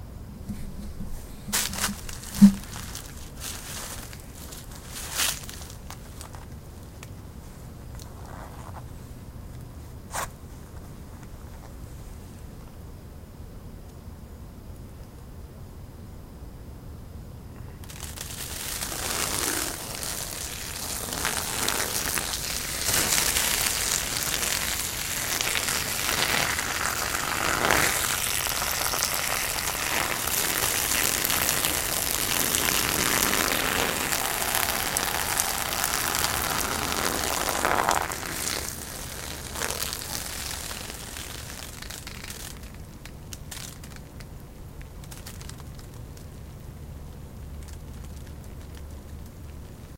Foley of Jules Ismail urinating outside at Four in the morning, likely in Autumn.
leaves, urination